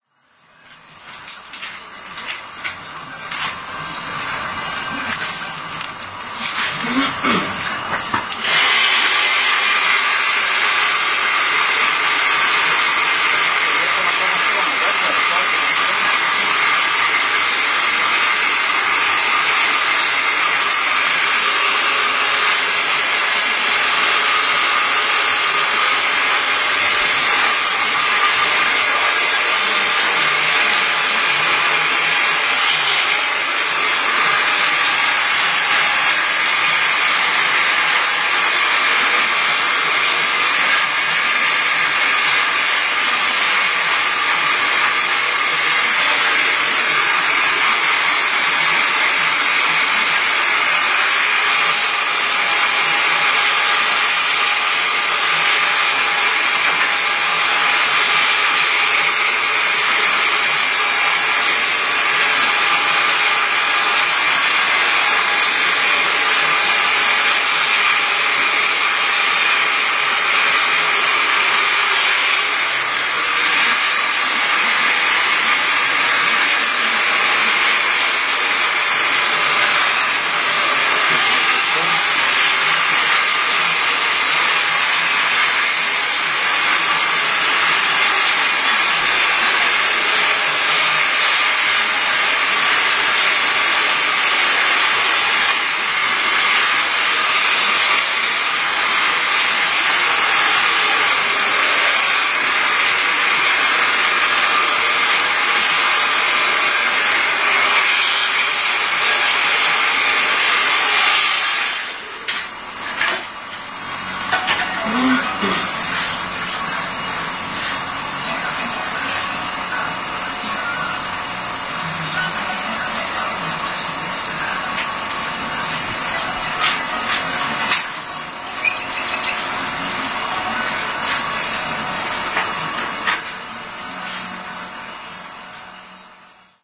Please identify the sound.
20.08.09: a barber's shop on Szkolna street in Poznan (near the Old Market). The barber hairdrying one of the man.
barber, dryer, fan, hair, hawk, radio